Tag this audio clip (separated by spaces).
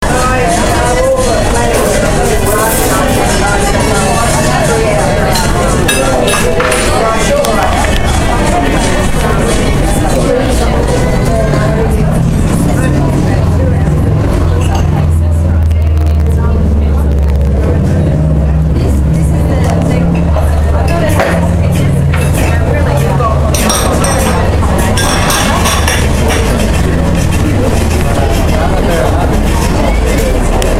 conversation laneway cafe australia melbourne